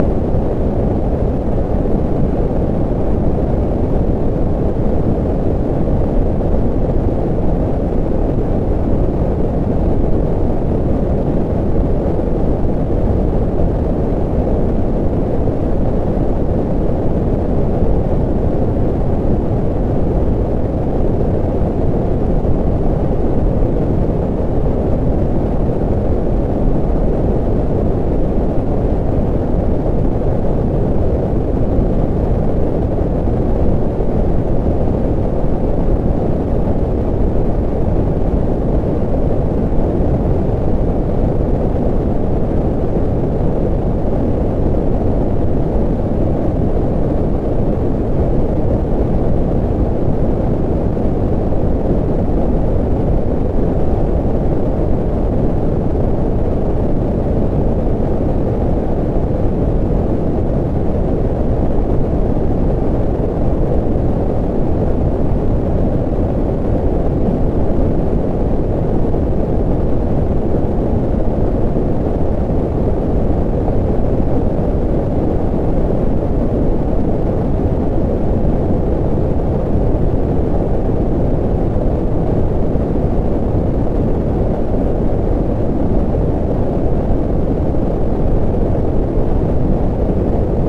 Computer Generated Wind
This is the sound of wind as generated by my NaturalNoiseGen program. NaturalNoiseGen uses several timed generators to produce complex natural noises. In this case, 80 low-frequency generators were used.
computer-generated, constant, wind